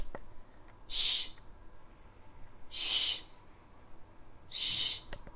Three quick shhhh! sounds from my own voice
Recorded with a zoom mic
shh, shush